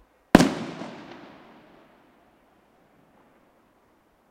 single firecracker / un cohete